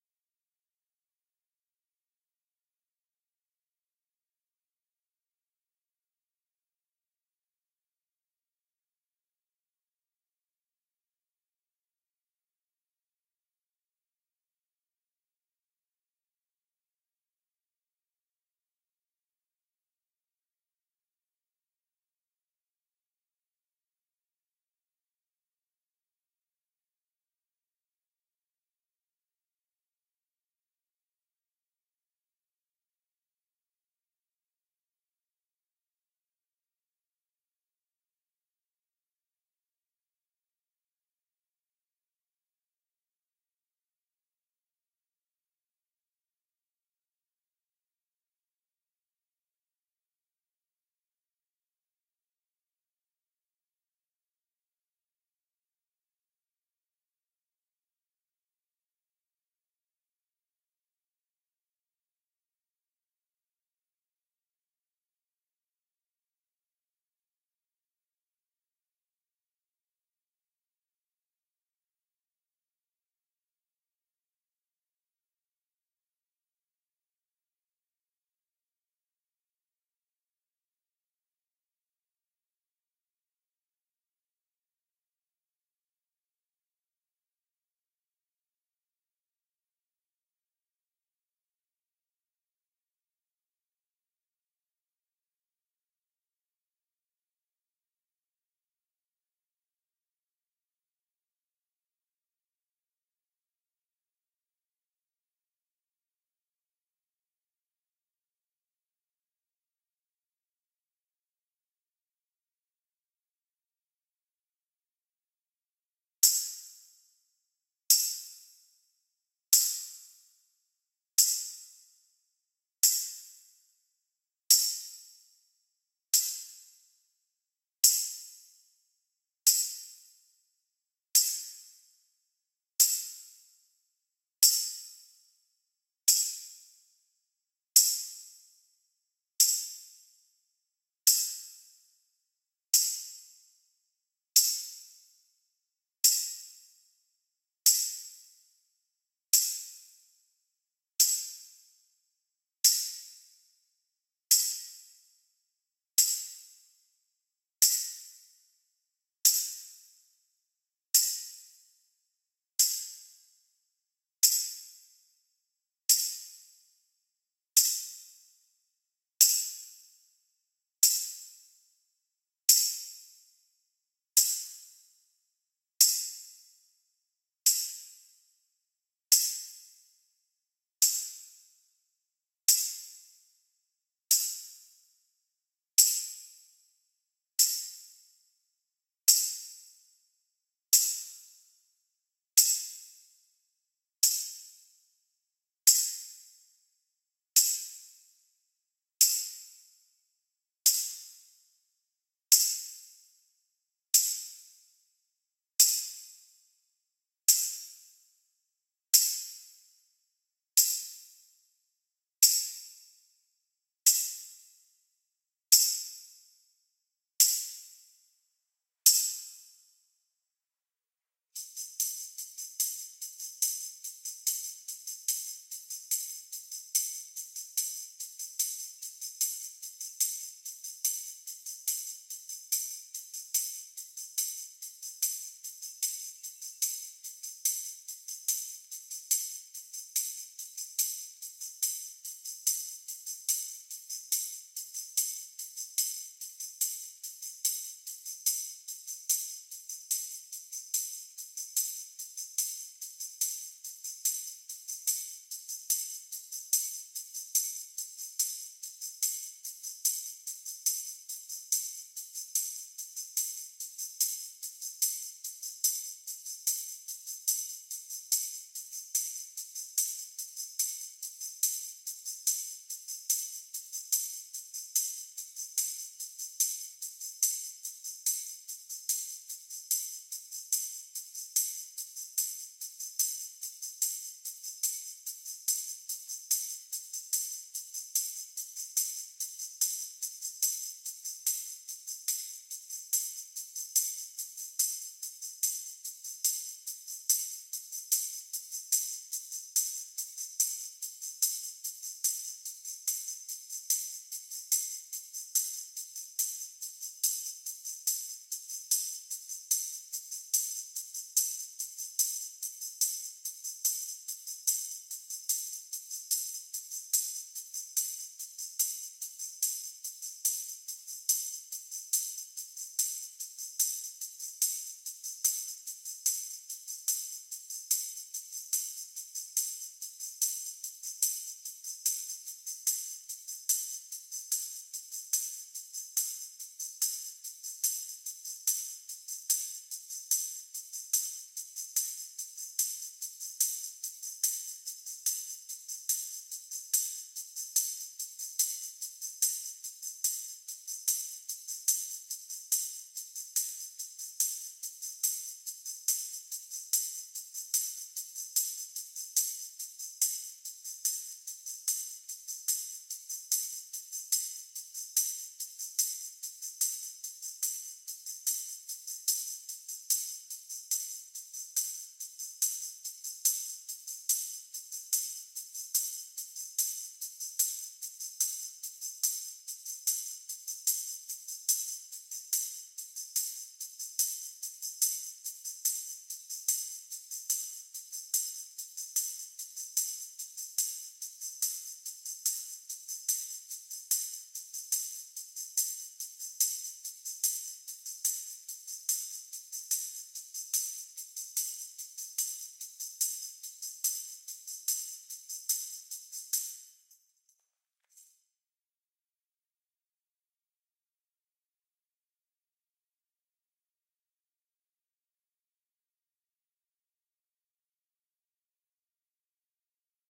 We are the world - tambourines
I made a drum recording of We are the world, and this is the tambourine track for it. Two different rhythms, on the snare in the middle of the song, and 1/16ths with backbeat accentuation in the end (after the key change).
There is also a drums track and handclap track for the song, synced.